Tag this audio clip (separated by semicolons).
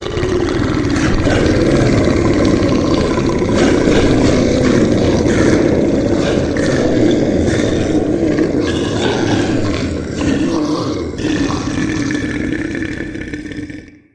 alien; animal; animation; beast; dinosaur; dragon; fantasy; fearful; growl; grunt; horror; monster; predator; roaring; scary